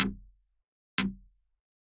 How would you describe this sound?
Tried to make chords out of really short synth shots... Probably need loads of compression (or maybe just more decay/release).
chord, loop, minimal, synth, tech